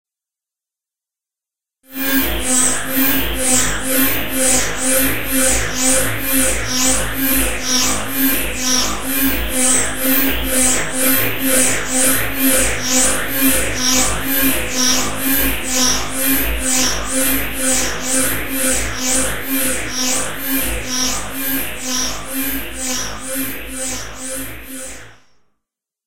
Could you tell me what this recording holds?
FactoryFusionator 4: Machinery used to mfg transportation pods for the SynGlybits.